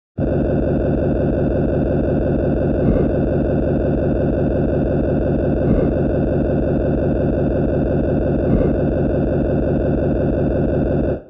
Brain-Digging-1-Tanya v
digging, publi-domain, psycho-sound, sfx-for-animation, hammering, drill, electronic